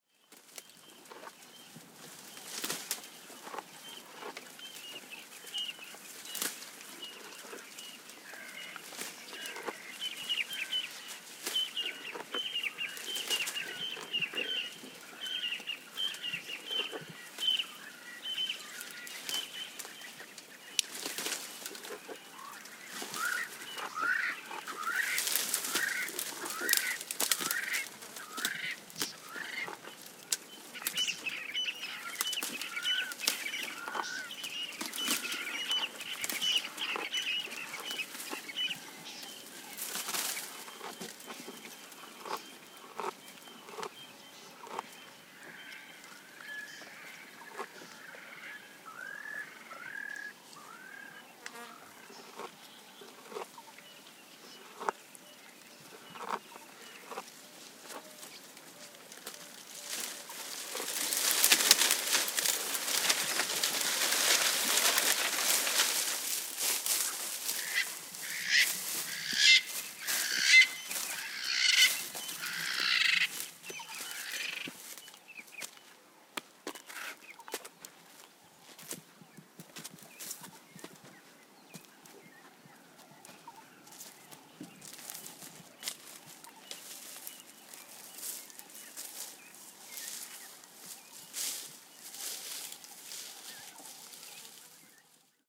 Girafe-En train de manger+amb oiseaux
A giraffe which is eating in Tanzania recorded on DAT (Tascam DAP-1) with a Sennheiser ME66 by G de Courtivron.
africa, eating, giraffe